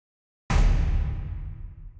Drum Hit 2
This big drum hit is stereo and perfect for any kind of music or sound effect to highlight an emphatic point of your project.
Hit, Large, Sound, Drum